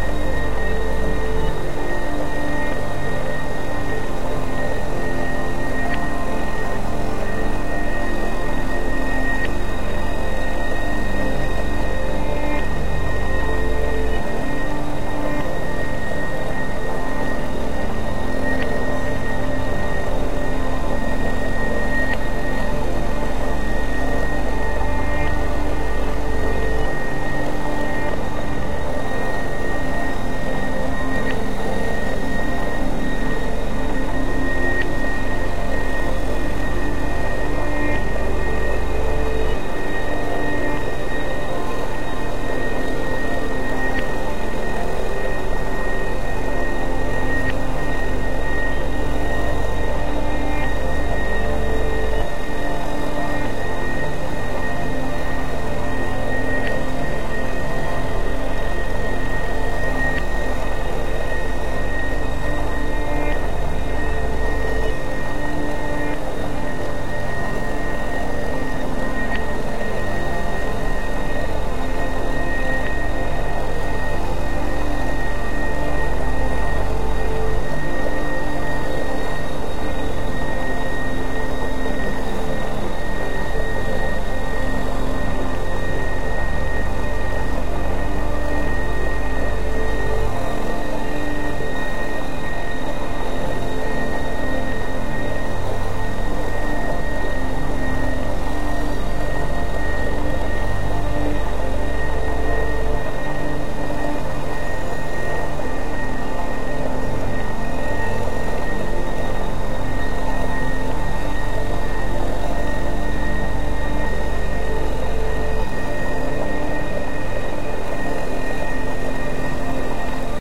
One of these sounds that I found on my hard drive, I forget how it came about. Probably some Rhodes and lots of delay or something.